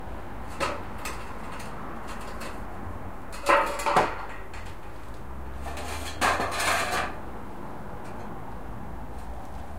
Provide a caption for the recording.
metalworking.handle 5
Worker handled metal sheet.
Recorded 2012-09-30.
builder, construction, noise, repair, rumble